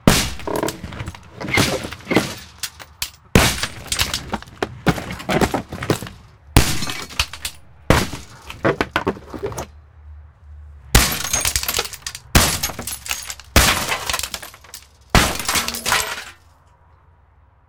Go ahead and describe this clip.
window small smash with axe metal grill glass shards debris
axe, debris, glass, grill, metal, shards, small, smash, window